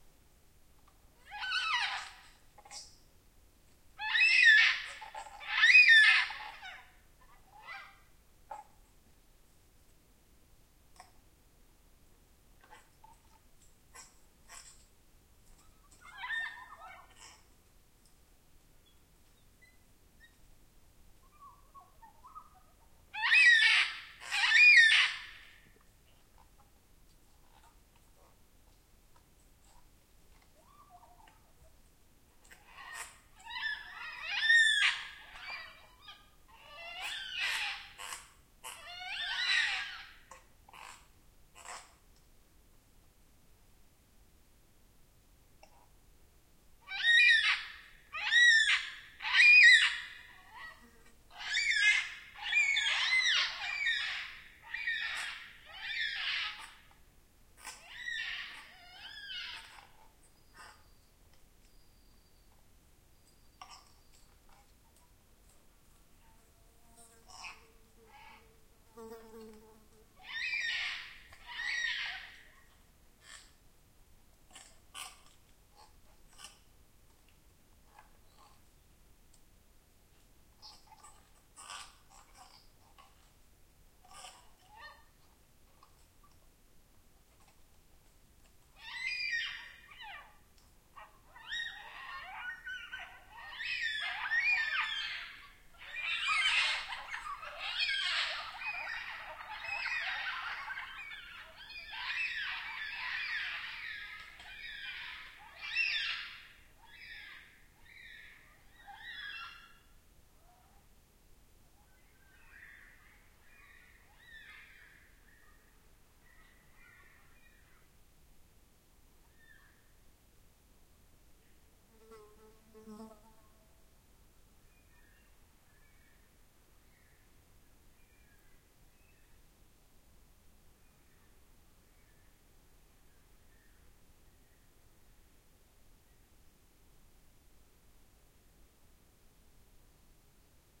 Yellow-tailed Black Cockatoos in trees near Florentine River, Tasmania, one ripping bark off tree, then flock flies off; March fly. Recorded 7.32PM, 4 Feb 2016 with internal mics of Marantz PMD661.
birdsong, Tasmania, black-cockatoo